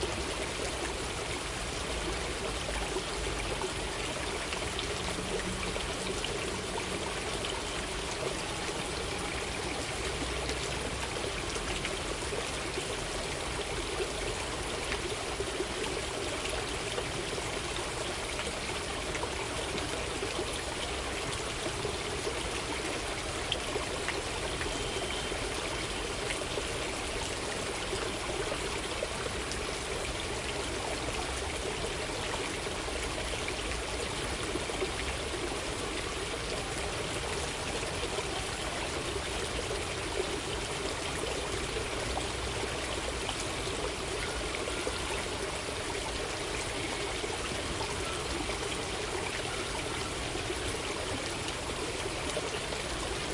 Water in Parc Merl 1
Recording session in Parc Merl.
field-recording, water, ambience, ambient, nature, parc